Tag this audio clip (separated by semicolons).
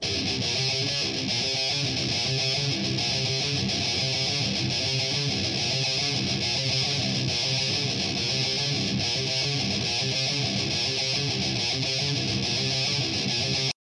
groove; guitar; heavy; metal; rock; thrash